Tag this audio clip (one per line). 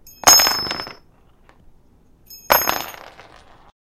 shaked
wood
falling
near
rattle
shaking
screws
metal